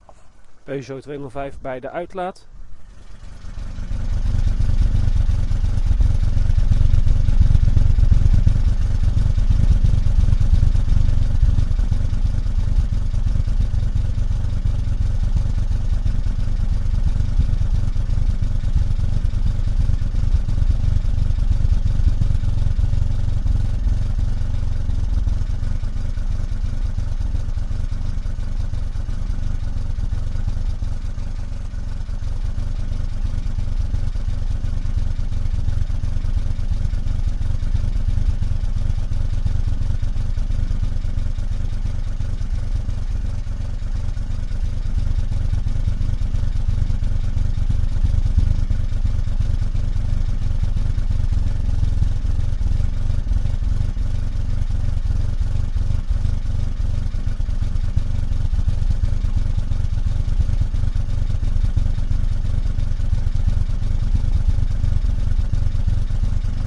Peugeot 205 exhaust
The exhaust of a stationary running lively machine recorded close-mic with a DR100 and MKH70.
Peugeot 205 XS '88.
For everyone who loves organic sounding machines like me. Music.
Tascam, lively, DR100, Organic, stereo, Machine, Peugeot